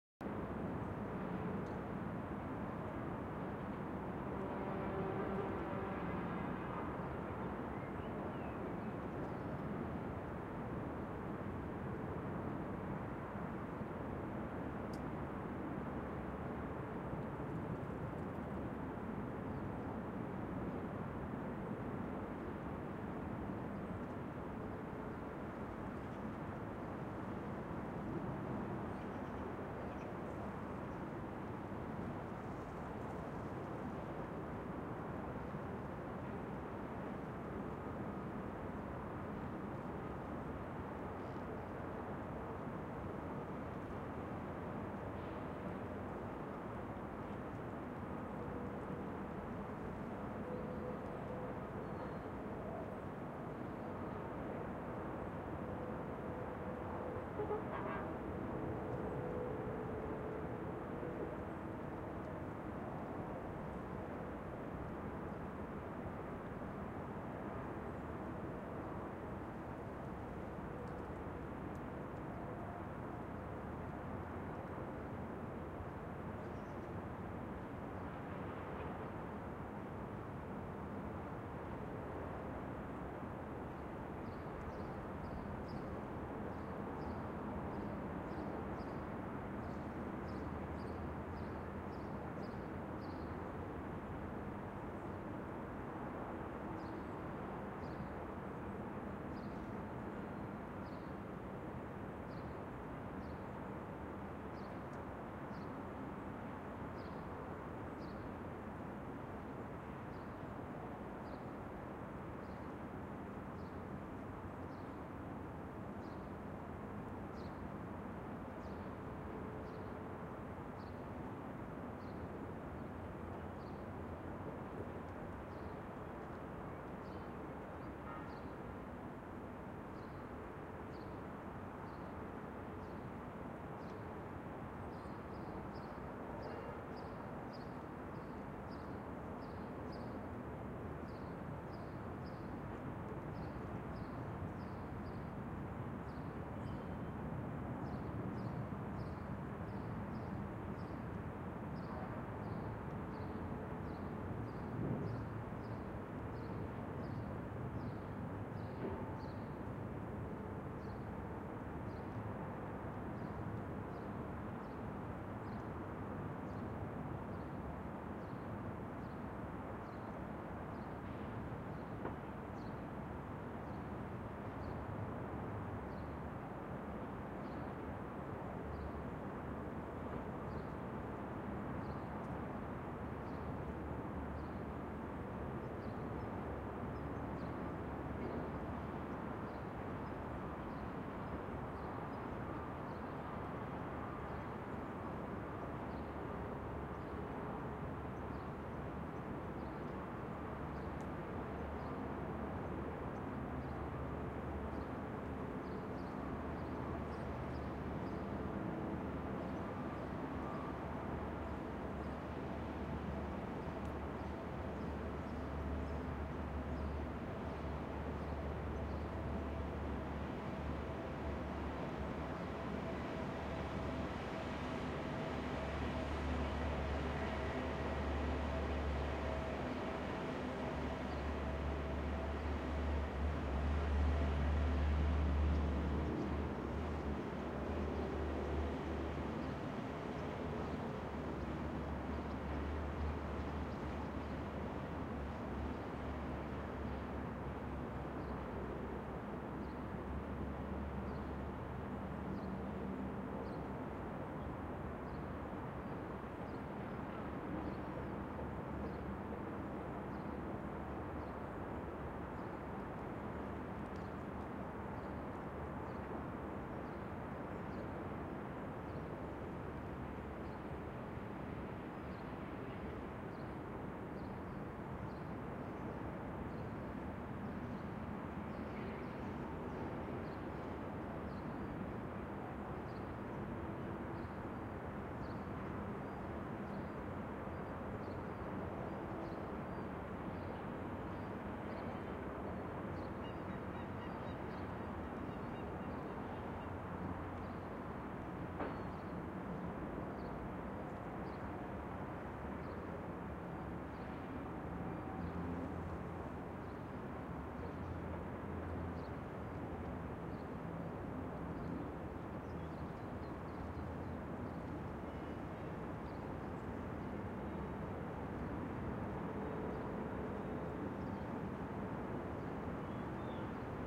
Recorded with a Cantar X, Neumann 191, a quiet XY atmo @ the city's cemetery, on top of the hill, facing the seaside.